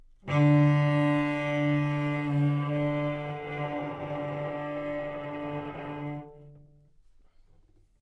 Cello - D3 - bad-richness
Part of the Good-sounds dataset of monophonic instrumental sounds.
instrument::cello
note::D
octave::3
midi note::38
good-sounds-id::4516
Intentionally played as an example of bad-richness
D3, multisample, single-note